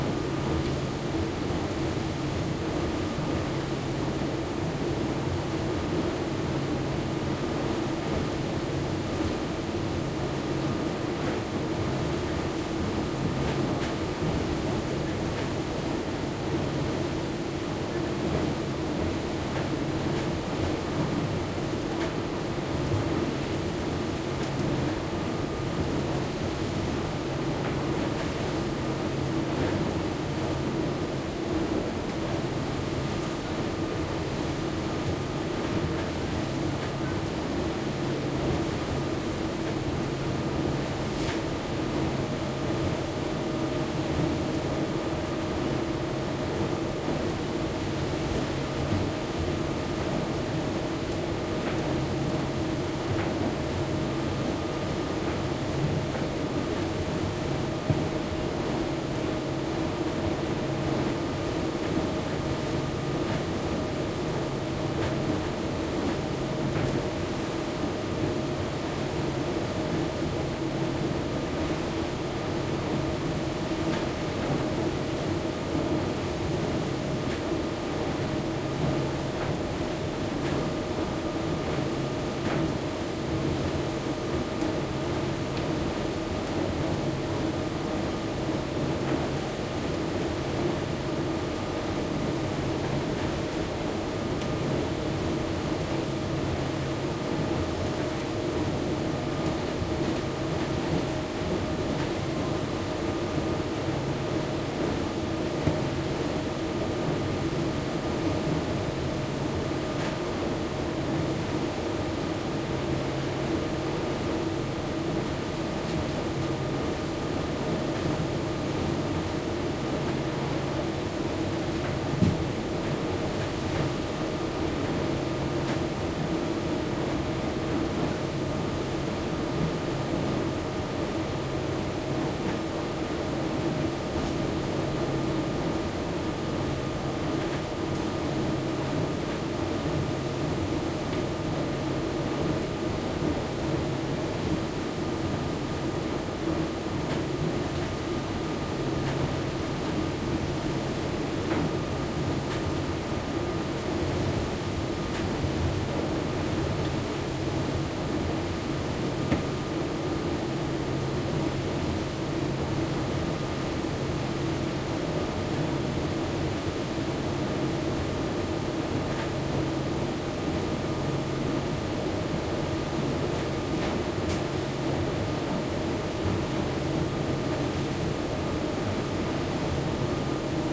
170718 SmallFerry Hold F
Inside the cargo hold in the lower fore section of a medium sized passenger ferry cruising the archipelago around Stockholm/Sweden. Diesel motors, hull vibration, sea chop feature strongly along with some muted passenger voices.
Recorded with a Zoom H2N. These are the FRONT channels of a 4ch surround recording. Mics set to 90° dispersion.
ambience, diesel, drone, engine, ferry, field-recording, interior, motor, noise, ship, Stockholm, Sweden, transport